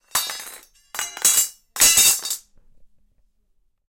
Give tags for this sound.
Falling; body; steel; scrap; hit; metal

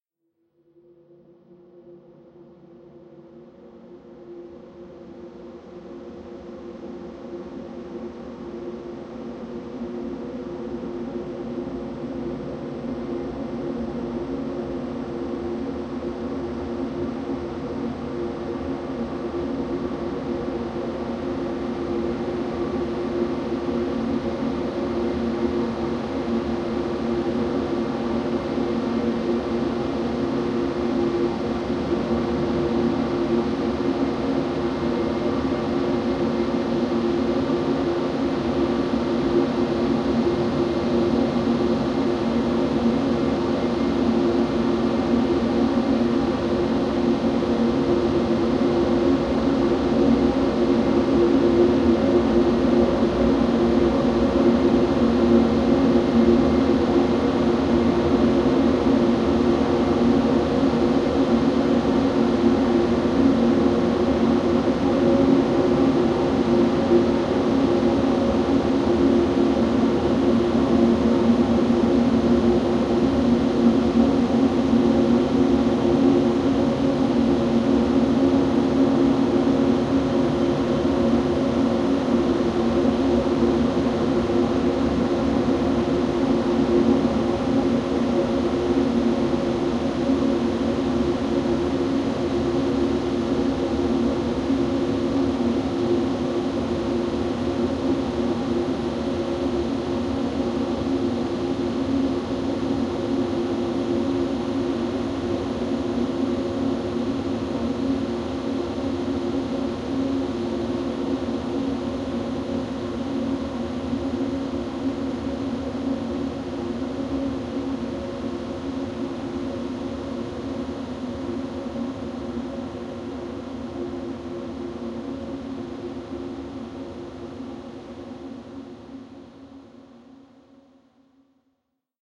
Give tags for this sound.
ambient; atmosphere; drone; multisample

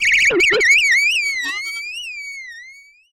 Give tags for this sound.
critter; synth; animals; alien; animal

